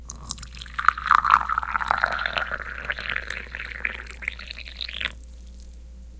pouring hot tea
cup, drink, fall, fill, green-tea, hot, hot-water, Japan, Japanese, liquid, pour, pouring, tea, winter